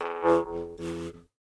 Jew's harp single hit